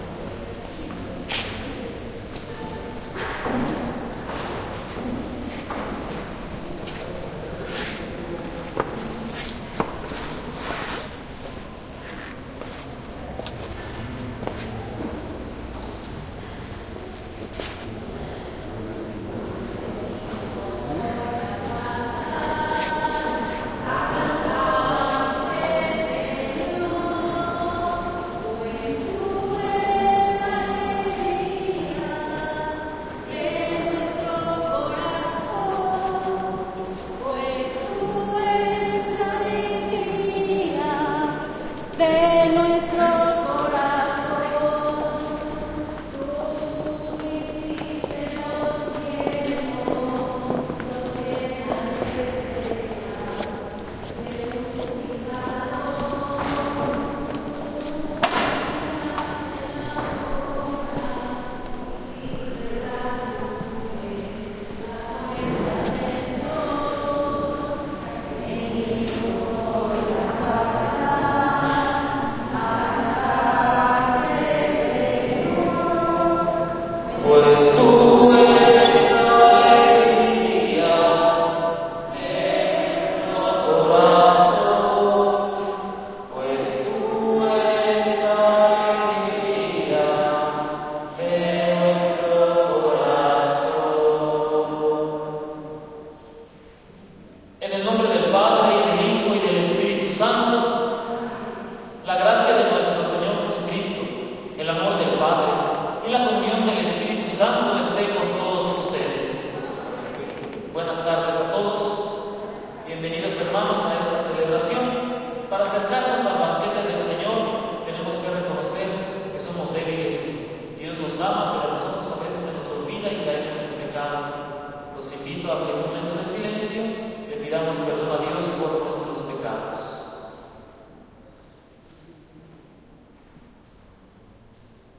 A recording in La Parroquia de San Miguel Arcangel